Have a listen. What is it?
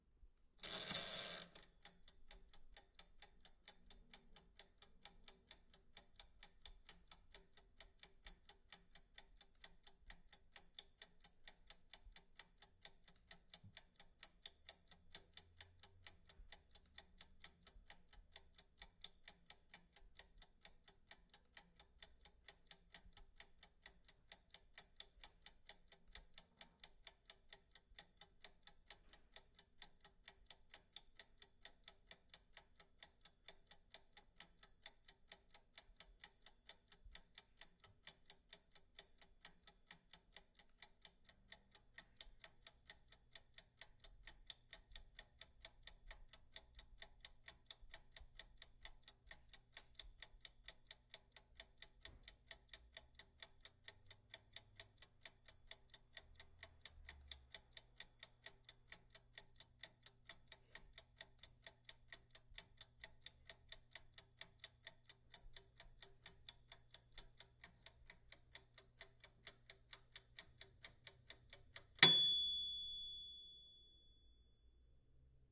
oven turn on beep
beeping sound from an old oven
oven, beeping, turn-on